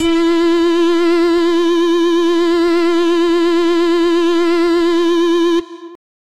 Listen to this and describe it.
Live Yukko Whistle Synth 04 75BPM
live yukko whistle synth